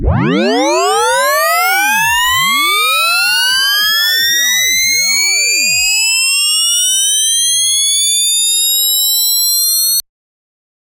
Uplifter Sweep 10s Three-Tones
Three generated tones with varying degrees of modulation sweeping up from 0.1--13k hz. Panned left, right, center.
119, build, build-up, effect, FX, noise, sidechain, sine, sweep, sweep-up, tone, uplifter, up-sweep, upsweep